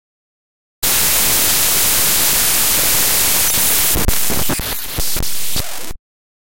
Glitch Noise 5
These are glitch sounds I made through a technique called "databending." Basically I opened several pictures in Audacity, and forced it to play them as sound files.
digital, distortion, glitch, harsh, lo-fi, noise